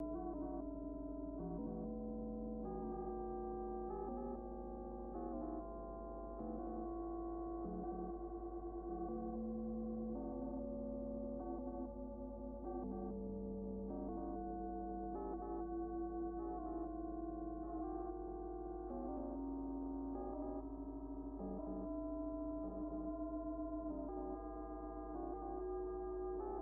Forbidden Planet 8
A collection of Science Fiction sounds that reflect some of the common areas and periods of the genre. I hope you like these as much as I enjoyed experimenting with them.
Space, Electronic, Noise, Sci-fi, Spacecraft, Mechanical, Futuristic, Machines, Alien